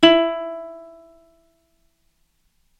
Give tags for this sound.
sample; ukulele

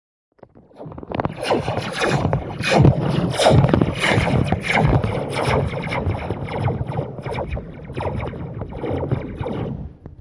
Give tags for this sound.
fire; Gun; Laser; Rapid; Sci-Fi

